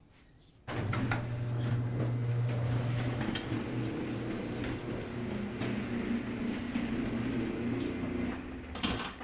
Garage Door Opening. Microphone used was a zoom H4n portable recorder in stereo.
field-recording, ambient, city